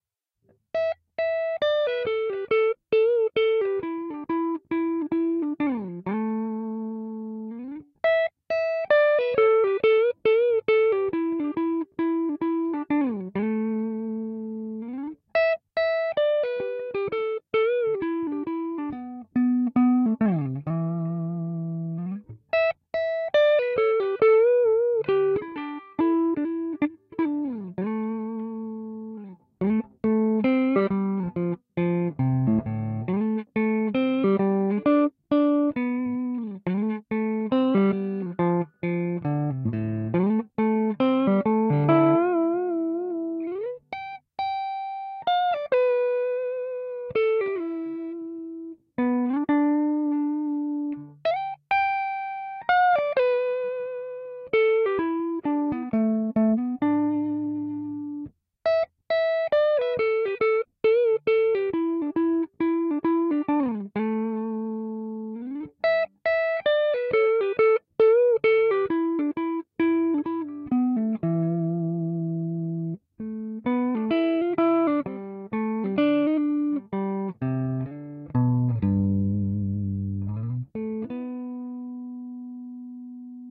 a high e which is low
starting out on the high E then go to the low E, sort of a laid back type of lick, clean tones